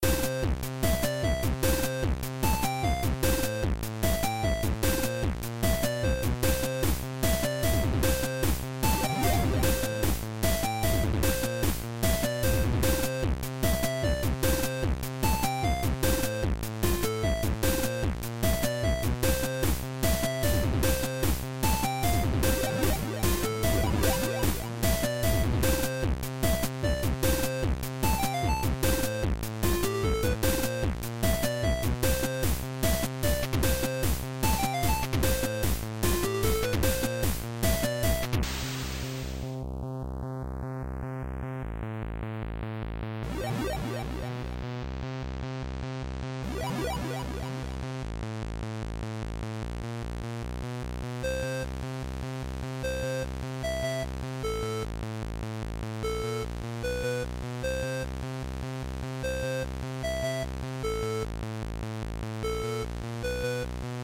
150bpm loop created for the Android game Storm RG.